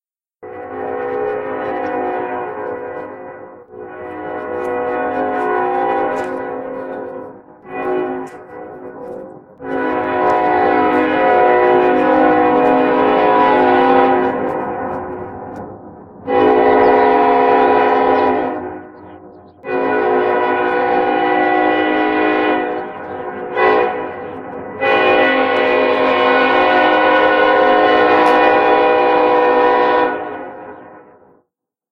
train sounds
field-recording, locomotive, railroad, railway, train